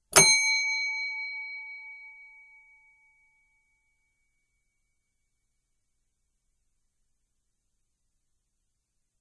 bell hotel service desk